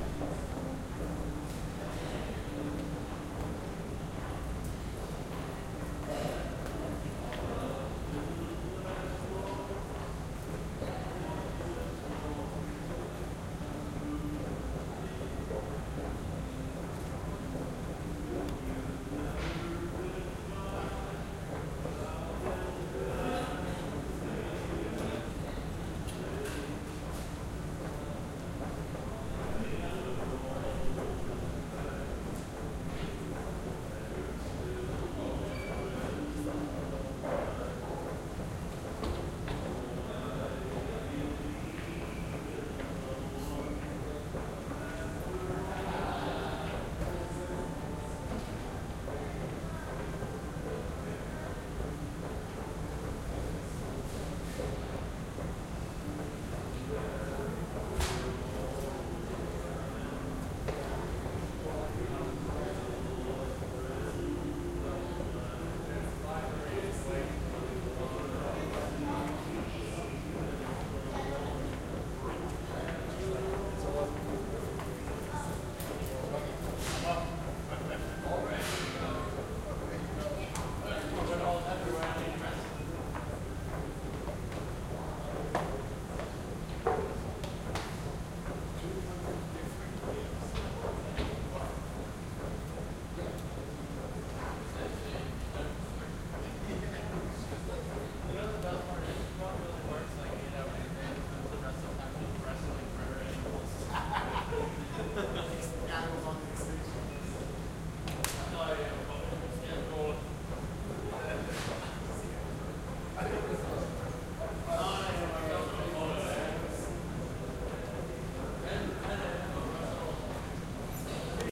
Inside Store 2
A recording of inside a store at night.
field-recording, inside, night, people, store